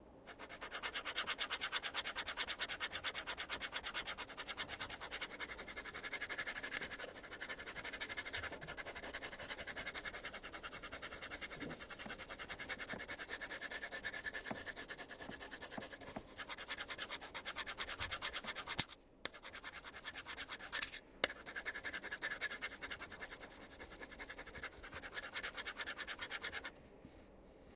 mySound Piramide Okan
Sound from objects that are beloved to the participant pupils at the Piramide school, Ghent. The source of the sounds has to be guessed.
drawing-pencil, mySound-Okan, BE-Piramide